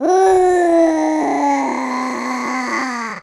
The teenager phase of a growing monster.
Recorded using NGT-2 directly by laptop microphone in. Pitch shifted using Audacity.